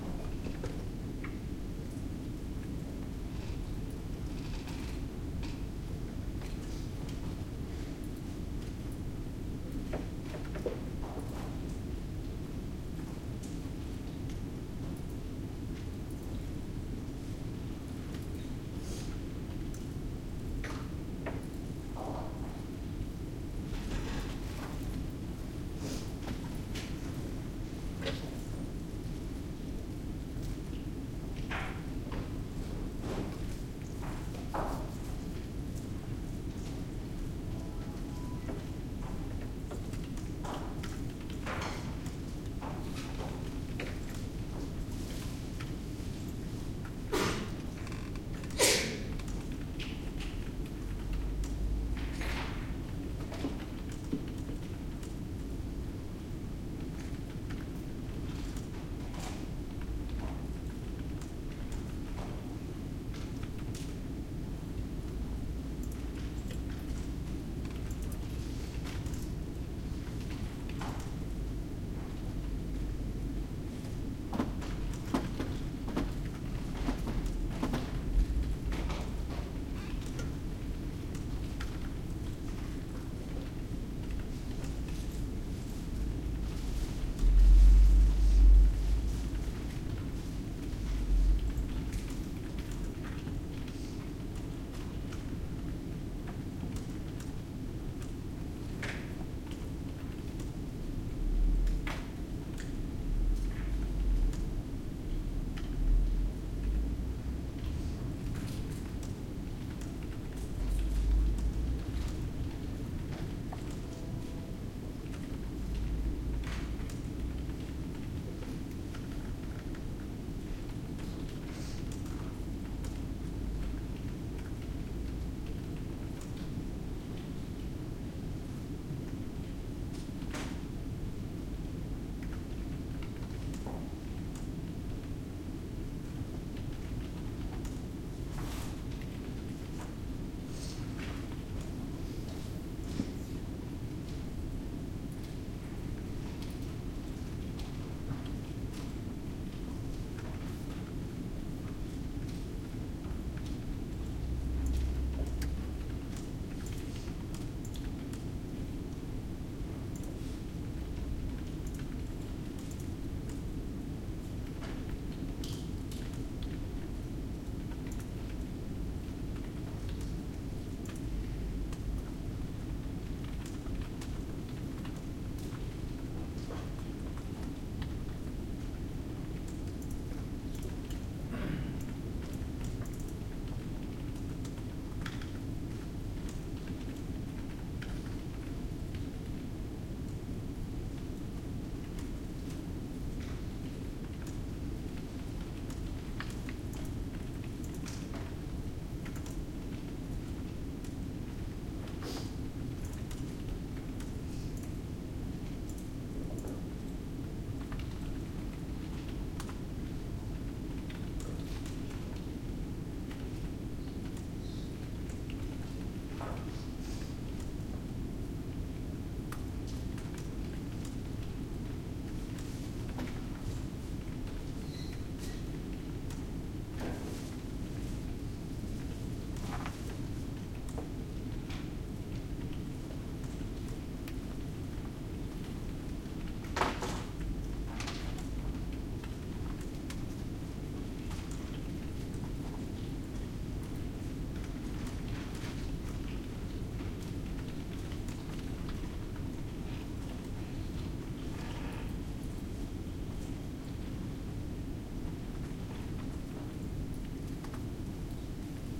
20121112 TU Delft Library, quiet study room - general ambience
A quiet study room in the library at Dutch university TU Delft. Sounds of the ventilation system, computer keyboards and mouses in the background. Some students cough. Recorded with a Zoom H2 (front mikes).
ambience, field-recording, library, netherlands, neutral, study, university, ventilation-noise, zoom-h2